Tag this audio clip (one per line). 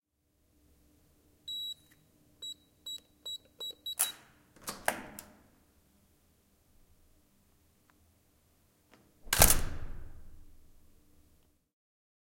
beep
code
door
key
Keycard
locked
open
safe